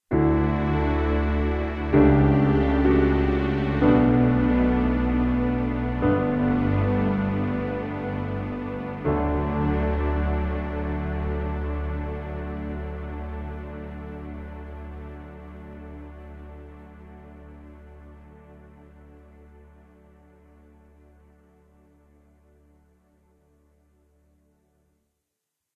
A relaxing/quiet mood sound. Recorded with Focusrite Scarlett 2i2 and Sony Sound Forge 10 using a Kurzweil SP4-7.
ambient, atmosphere, background, anxious, dramatic, ambience, mood, quiet, slow, atmos, relaxing, suspense, tears, drama, background-sound, film, fear, pain